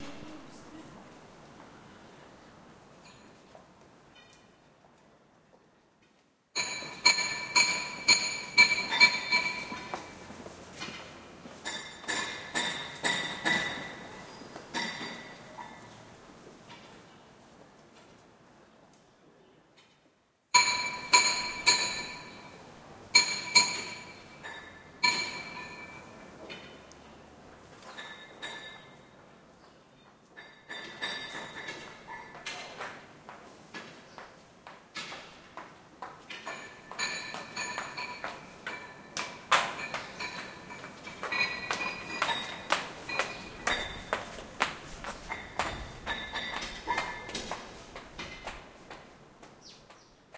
Streets of Riga, Latvia. Men at work
street sounds in Riga